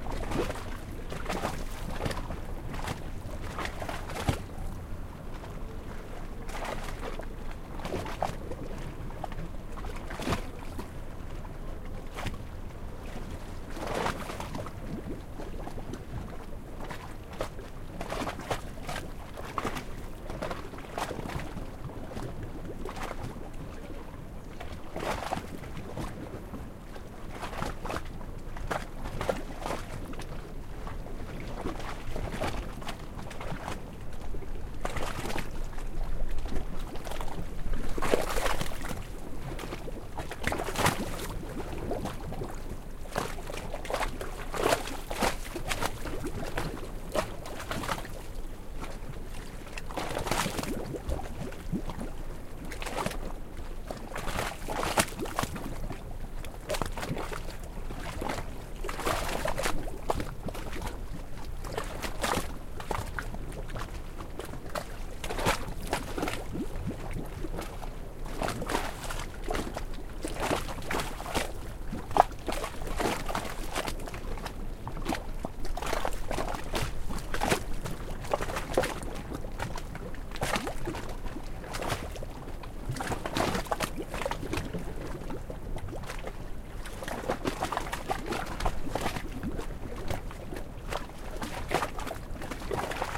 santorini waves port
Waves recorded in Santorini main port.
boiling, greece, port, sea, waves